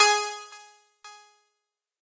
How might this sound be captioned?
Delayed melodic mallet highpassed 115 bpm G#3
This sample is part of the "K5005 multisample 03 Delayed melodic mallet highpassed 115 bpm"
sample pack. It is a multisample to import into your favorite sampler.
It is a short electronic sound with some delay on it at 115 bpm.
The sound is a little overdriven and consists mainly of higher
frequencies. In the sample pack there are 16 samples evenly spread
across 5 octaves (C1 till C6). The note in the sample name (C, E or G#)
does indicate the pitch of the sound. The sound was created with the
K5005 ensemble from the user library of Reaktor. After that normalizing and fades were applied within Cubase SX.
delayed, electronic